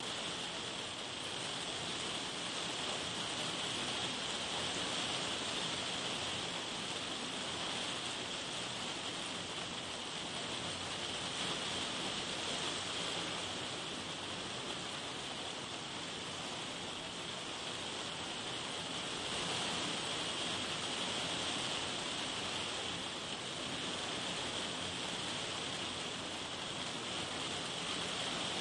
Wool Rain
Using an AKG C1000s and standing in my conservatory in my house I recording a heavy shower. The material of the conservatory makes it sound quite 'tinny', I guess you could say. The file is in stereo but only to make the file 30 seconds instead of 1 minute. It's 2 30 second chunks of the file hard panned, so pick which one you like :)
dorset, england, field-recording, rain, wool